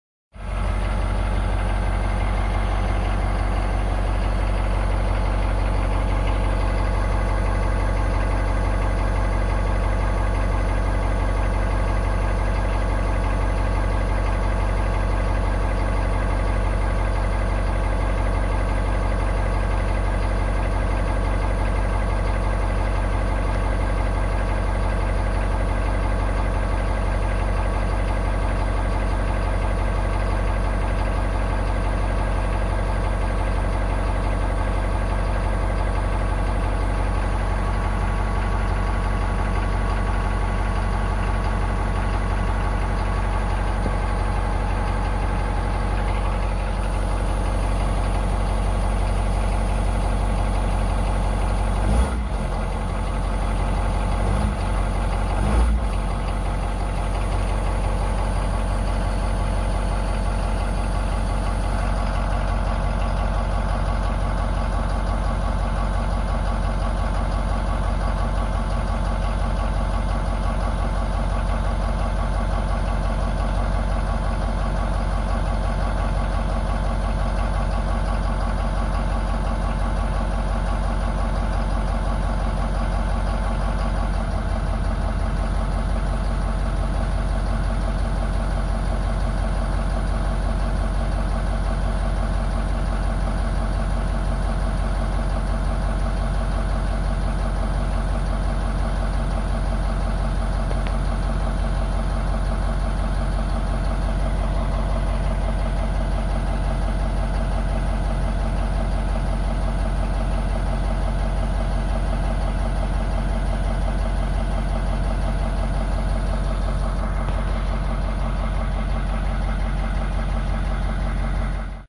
Truck Engine (Diesel)
This a Ford F250 diesel engine.